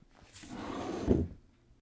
Interior recording of a chair/stool being moved sliding on the floor.
chair, household, interior, scrape, sit, stand, stool